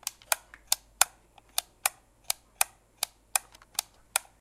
light switch 2
January2013, Germany, Essen, SonicSnaps